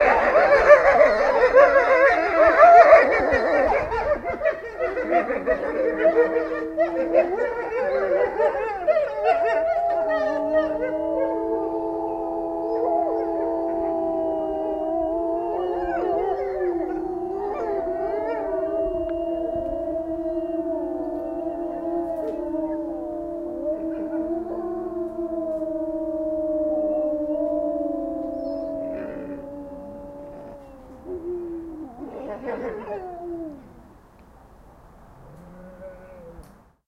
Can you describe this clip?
Short clip of 5 wolfs howl. Recorded with Zoom H1 build-in microphones.